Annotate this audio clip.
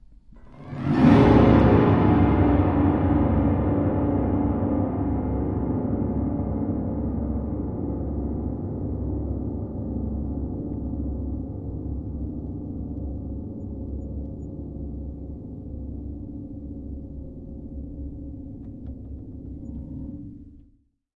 A recording of me dragging my fingernails on the naked strings of my piano while holding down the sustain pedal. Classic scary effect.